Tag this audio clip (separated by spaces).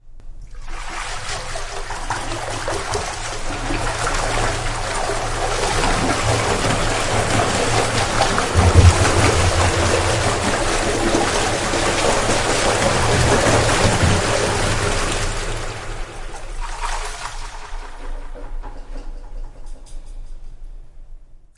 incident accident